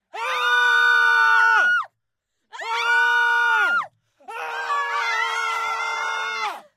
A group of people shouting with great fear.